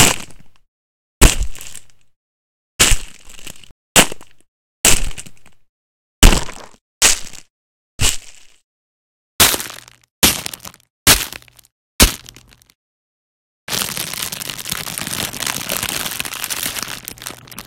package (bones or gravel hit)2
bones, crack, sfx